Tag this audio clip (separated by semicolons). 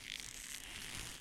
field; recording; twisted; squishy; rubber; twist